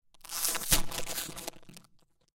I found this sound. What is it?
open snack bag-1
Opening of a snack bag, Recorded w/ m-audio NOVA condenser microphone.
doritos, papas, chips, envoltura, bag, snack, open